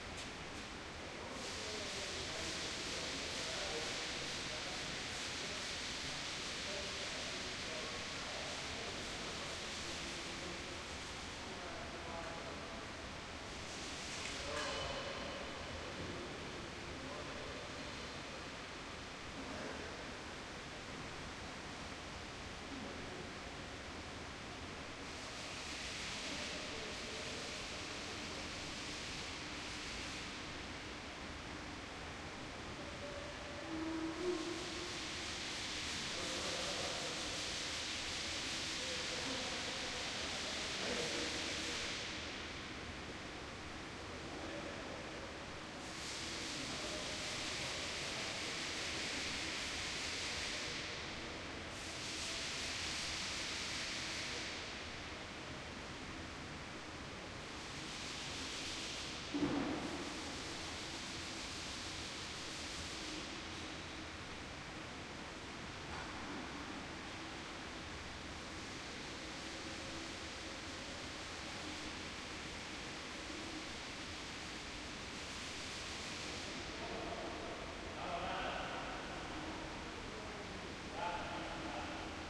cleaning day before opening of the new swimming pool and other swimming infrastructure
kantrida, rijeka